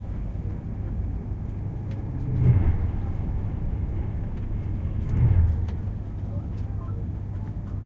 Trains pass in tunnel f
The muffled sound of 2 high speed trains passing in a tunnel.
field-recording, railway, train